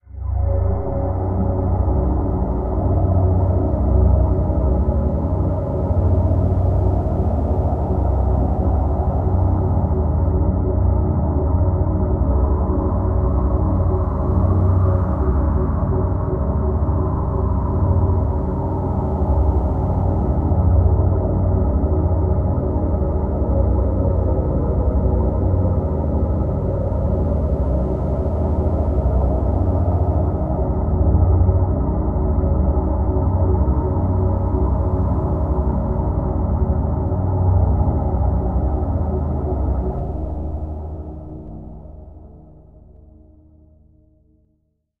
Forgotten Passage
A flat, bell-sounding windy drone. Not much bell-sounding though.
Atmosphere, Dark, Drone, Horror